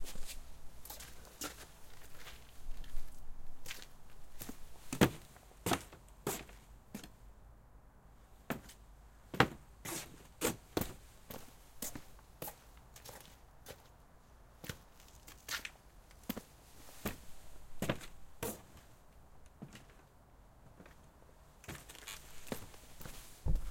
Walking terrace
people, terrace, walking